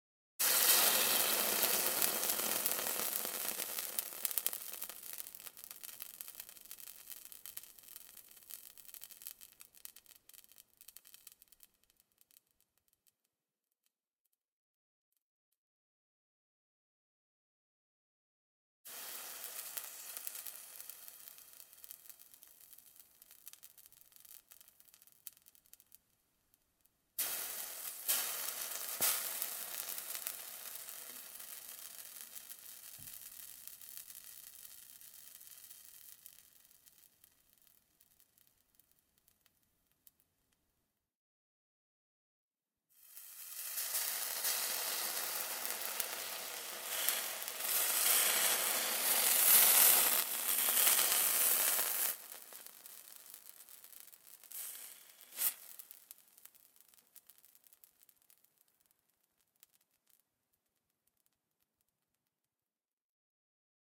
Household - Kitchen - Frying Pan Sizzle
Frying pan on electric hob - dropping water on to the dry pan.
Frying, fizz, Pan, fry, sizzle, Frying-Pan, fizzling, cook